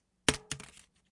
Paper Bin Toss 1
Me throwing a piece of paper into a bin.
bin-toss, book, crinkle, crinkling, crumple, crumpling, newspaper, page, pages, paper, paper-crumple, paper-in-bin, paper-toss, rustle, rustling, toss